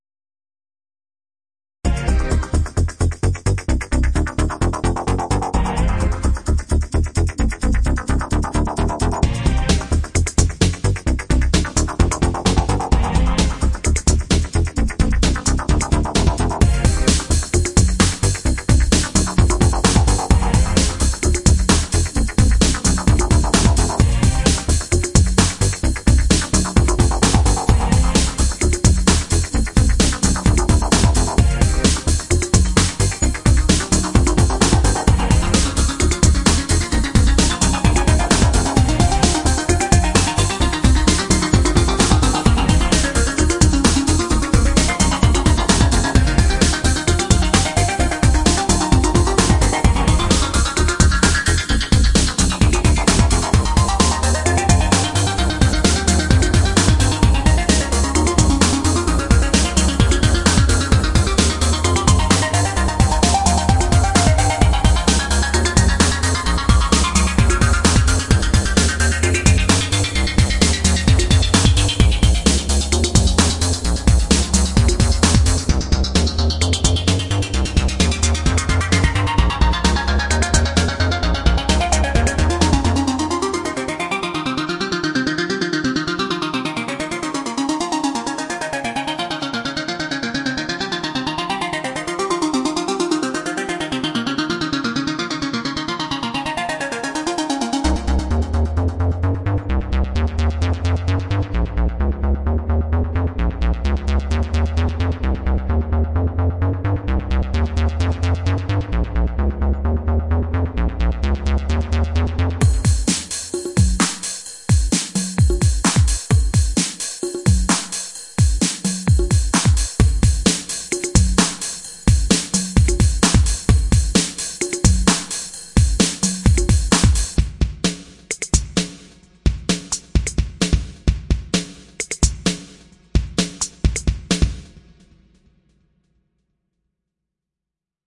Orange free modern music 003
A re-mix of this ::
Basically turned it into a breakbeat track. The notes are (c d# f f# g) then the phrase shifts down with a# as the base instead of c. Left the stems at the end for ya'll. Done in Caustic 3. Plëâ$ē Éñjö¥